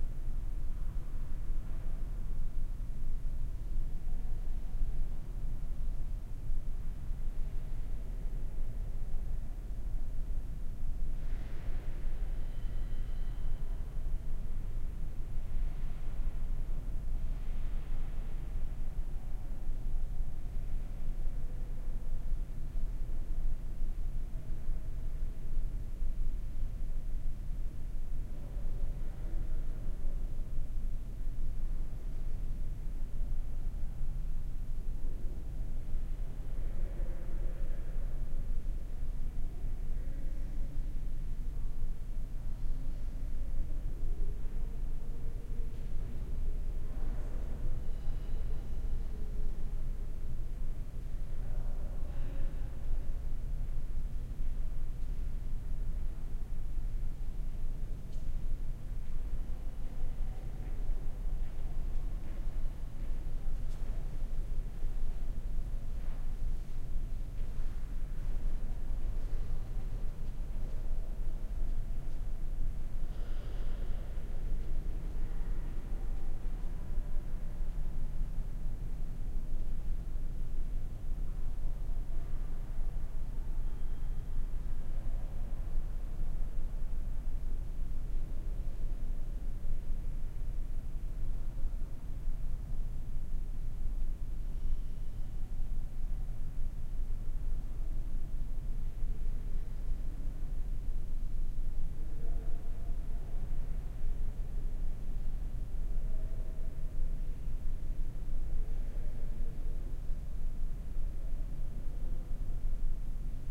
Museum Gallery 8
gallery, people